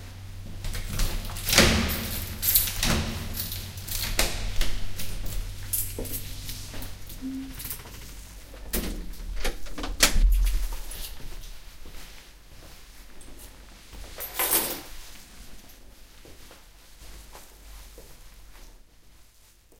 20091226.soft.door
keys turn twice in keyhole (reverberant stairwell), door opens, then closes softly (reverberations disappear). Keys are dropped on table, I'm home. Olympus LS10 internal mics
arrival, door, field-recording, frontier, home, opening